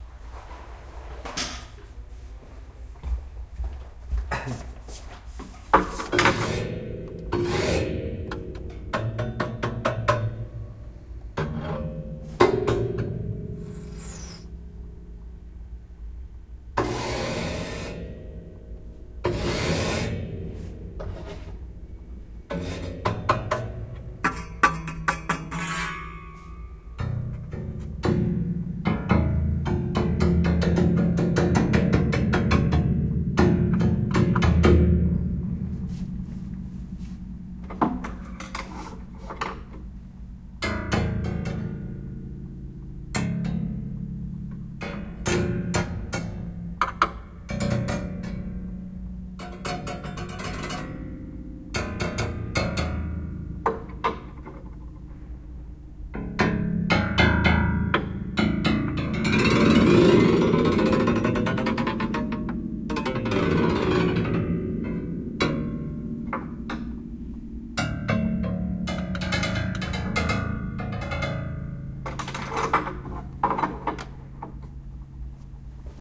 Upright Piano [B] Extended Weirdness 1
Extended, Extended-Technique, Improvisation, Percussion, Percussive